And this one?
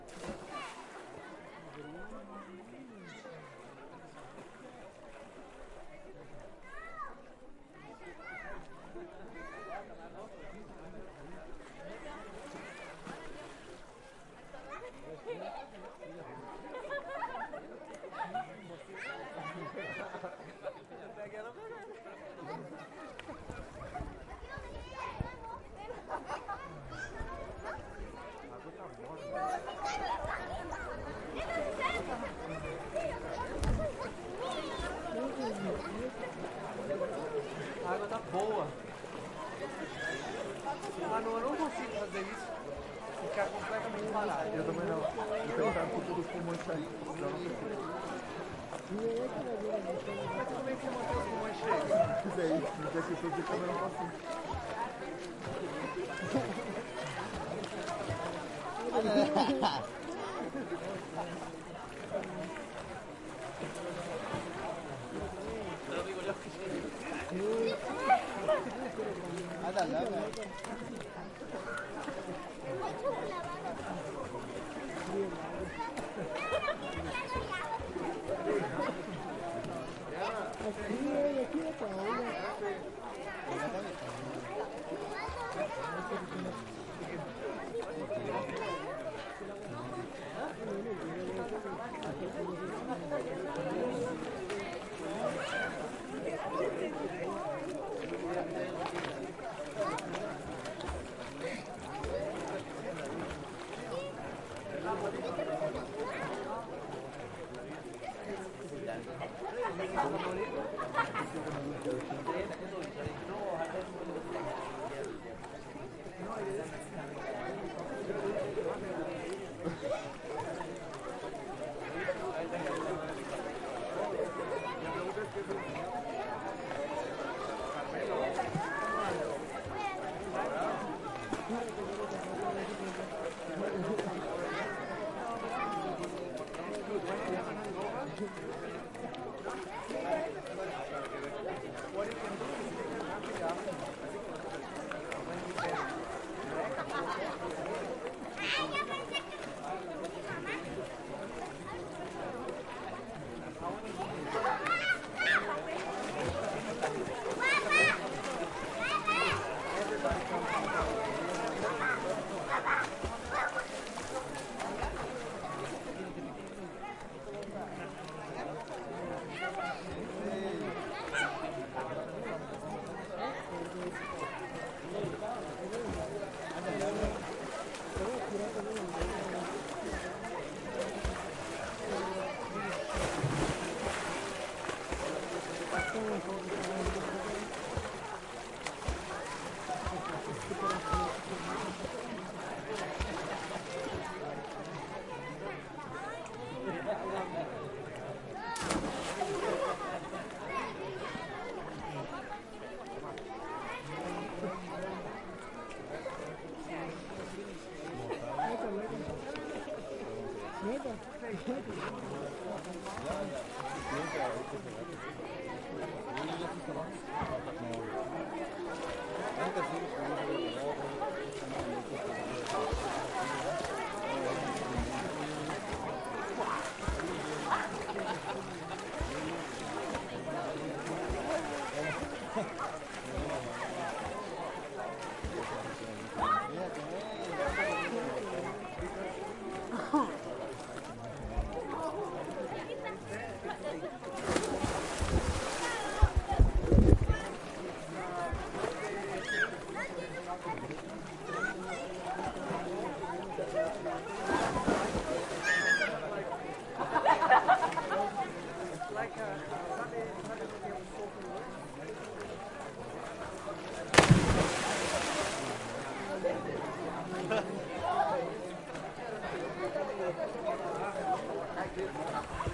Gente disfrutando el Cenote Xlacah, Dzibilchaltun, Yucatán / People enjoying de Xlacah Cenote in Dzibilchaltun, Yucatán
Grabación al mediodía en el Cenote Xlacah, una maravilla natural a 17 kilómetros de Mérida, en el estado de Yucatán, México.
People, Soundscape, Splash, Water